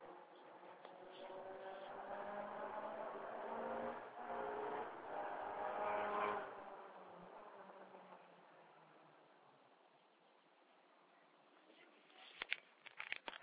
Remote competition. Sound of engine about 1 km far. Recorded by Nokia 6230i.
competition, Rallye, remote-engine-sound